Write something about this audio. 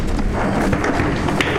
industrial sound design
industrial, sound, design